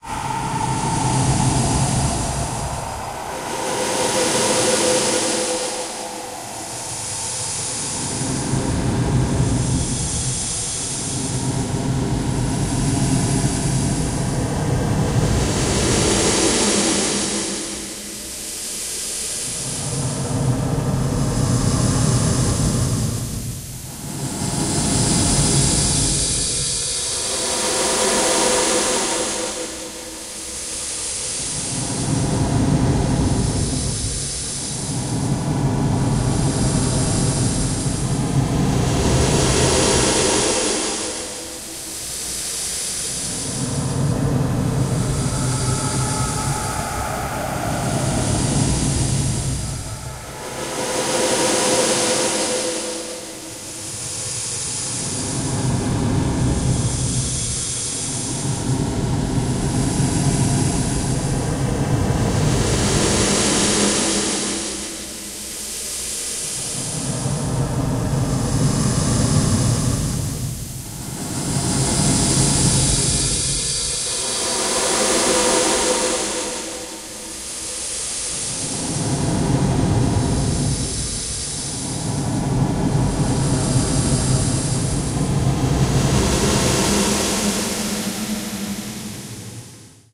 space breathing
This is one of my breakbeats put through Paul's Extreme Soundstretch ! End result sounds like outer space stuff, kinda edge to it, put your own effects on this, for use in all kinds of your creative projects. Hope you enjoy :)
aliens, equipment, alien, suit, canyon, inhale, vocal, human, air, gravity, prison, exploration, cave, voice, astronaut, horror, chamber, oxygen, breathing, torture, reverb, space, explore, breath, outer, exploring, exhale, gasp